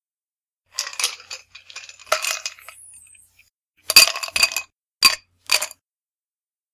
Me dropping ice into a glass. Noise Reduction used. Recorded at home using Conexant Smart Audio with AT2020 USB mic, processed with Audacity.
frozen, drink, ice-in-glass, Ice-Cubes, cold, thirsty, ice